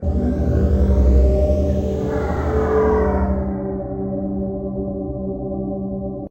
a dark ambient sound, heading to a soundscape.
ambient, dark, space, voice